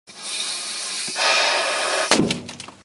255 Loonerworld Balloon Pop
pop, burst, Loonerworld, loonerworld-balloons, explode, balloons, balloon